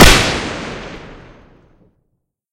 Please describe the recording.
I made the sound quite punchy also. Created with Audacity.